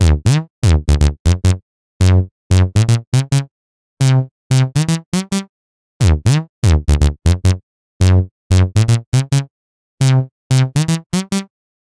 Freeze 1-Bass-Gekko-1
A short phat loop-able bass line.
bassline, short, loop